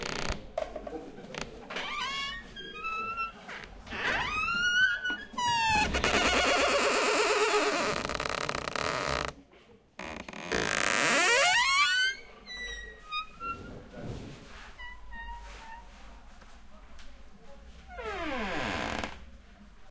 door wood open close very squeaky wobbly
close, door, open, squeaky, wood